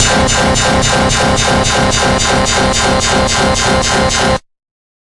43-8th Dubstep Bass c3
Dubstep Bass: 110 BPM wobble at 1/8th note, half of the samples as a sine LFO and saw LFO descending. Sampled in Ableton using massive, compression using PSP Compressor2. Random presets with LFO settings on key parts, and very little other effects used, mostly so this sample can be re-sampled. 110 BPM so it can be pitched up which is usually better then having to pitch samples down.
electronic, wah, synthesizer, beat, synth, sub, loop, wub, lfo, club, electro, porn-core, bpm, trance, 110, dance, rave, effect, wobble, Skrillex, dub-step, processed, noise, bass, dub, sound, techno, dubstep